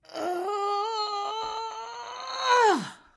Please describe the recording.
build up then release 2
An older midwestern woman voices herself gathering energy before releasing a powerful energy ball attack (this was for a video game).
recorded with ICD UX560
Recorded with ICD UX560, possibly while using a deadcat.
acting, american, build-up, english, exertion, female, game, grunt, pain, power, power-up, video-game, vocal, voice, voice-act, voice-acting, woman